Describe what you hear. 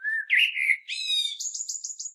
Morning song of a common blackbird, one bird, one recording, with a H4, denoising with Audacity.